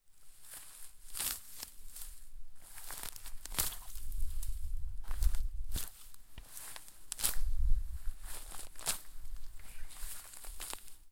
tearing of weed